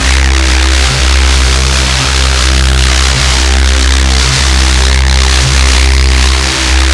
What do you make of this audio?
ABRSV RCS 009
Driven reece bass, recorded in C, cycled (with loop points)
bass, driven, drum-n-bass, harsh, heavy, reece